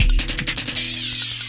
special fx audio